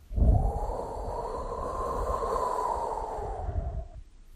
wind breeze swoosh air gust
air, swoosh